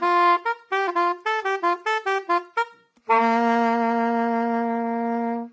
Non-sense sax played like a toy. Recorded mono with dynamic mic over the right hand.